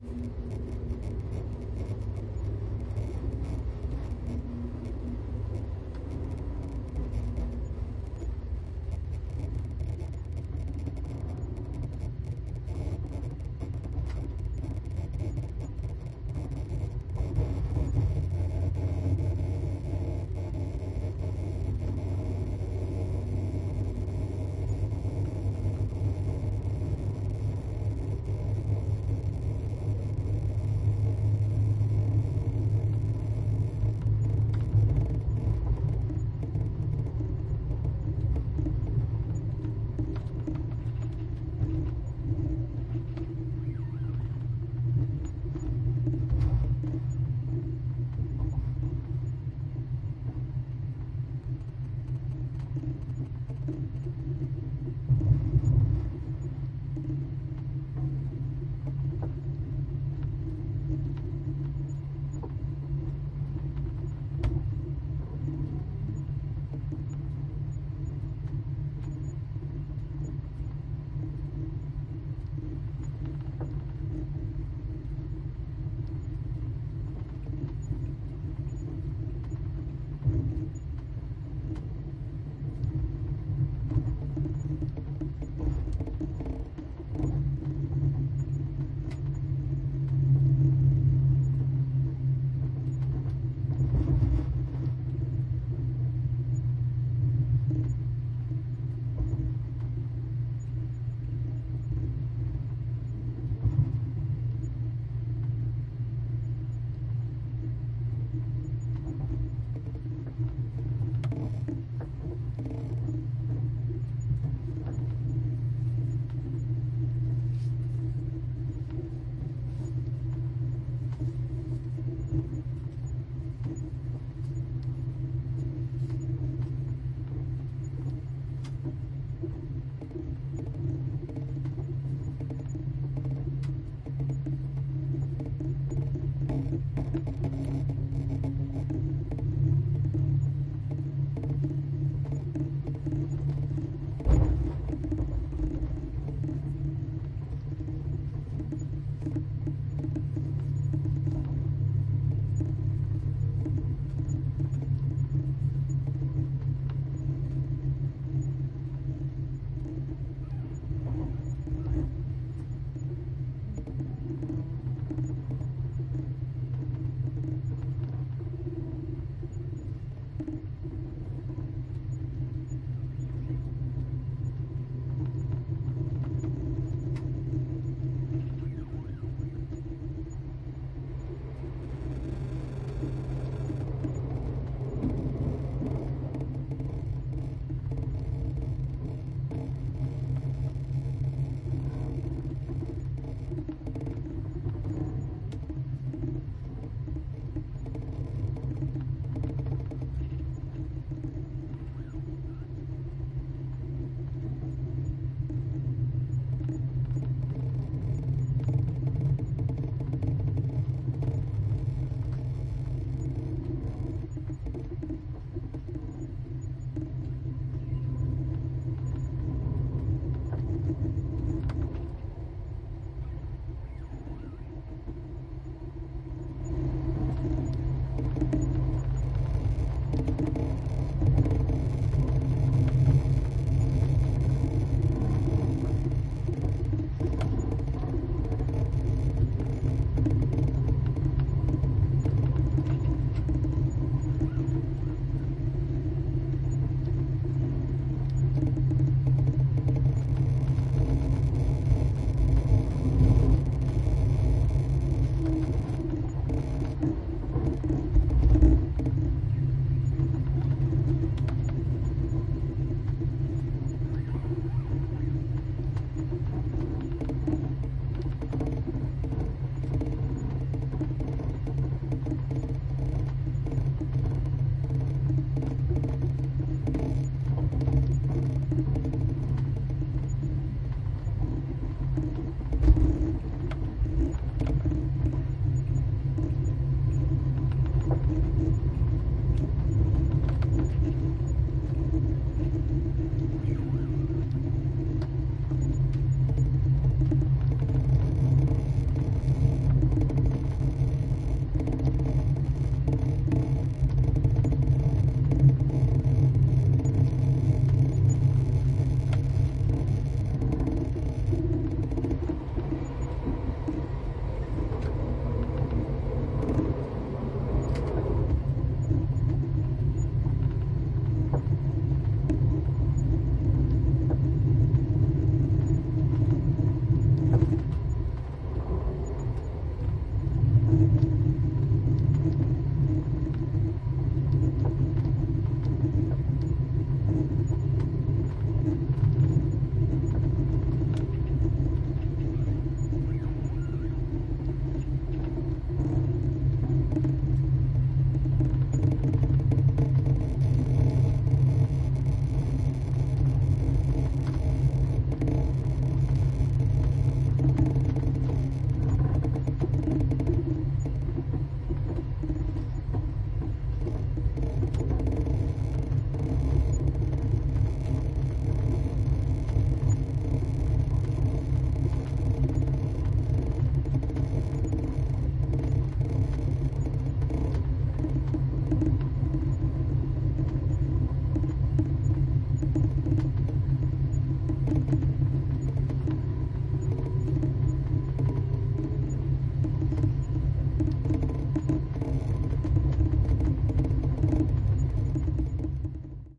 Sound from a sleeping cabin in an overnight train from Trondheim to Oslo. Recorded on November 22, 2019, with a Zoom H1 Handy Recorder.
mechanical, train